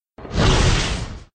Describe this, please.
Harry potter spells
magic, protego, harry, wand, spells, potter, power